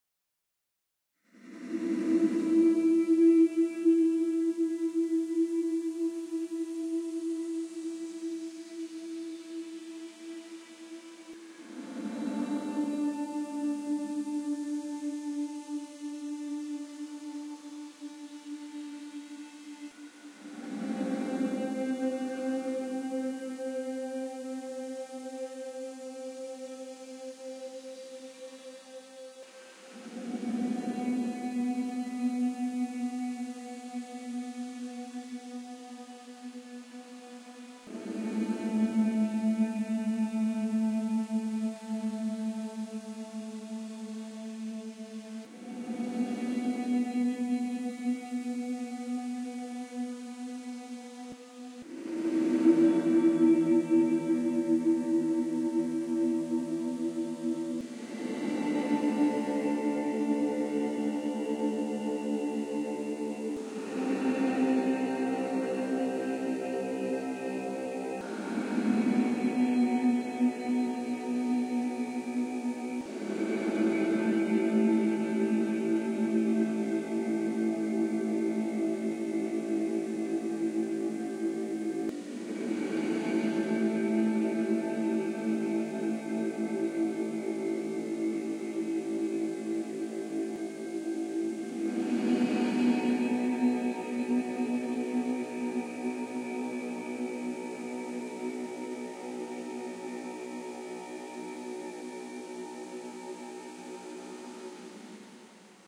Sinister Drones
Drone sounds that I remixed from piano playing. Used Paulstretch.
drone, menacing